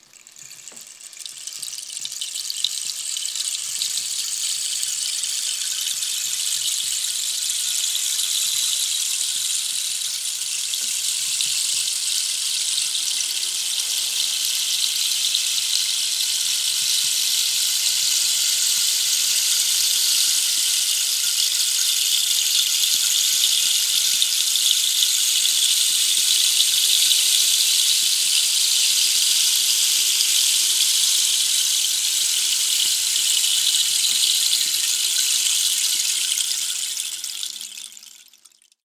Rainstick 39 sec
39 seconds of a rainstick, using a Bryer Dynamic mic to record it.
rainstick, 39